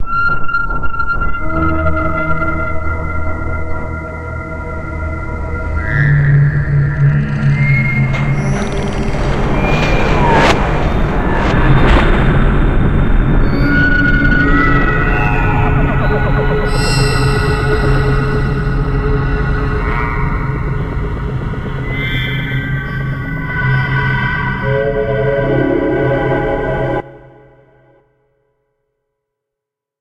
Scary effect
Effects recorded from the field of the ZOOM H6 recorder,and microphone Oktava MK-012-01,and then processed.
atmosphere, background, cinematic, dark, game, horror, metal, metalic, movie, scary, transition